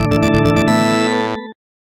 00-Game Load
This sound plays when you select Galaga Arrangement Resurrection in the Arcade room of the Namco Museum in Namco Revenges. Created using OpenMPT 1.25.04.00